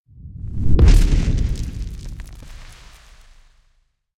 Dragon Land
dragon, torch, hot, magic